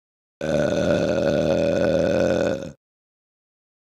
High Burp 06
disgusting, human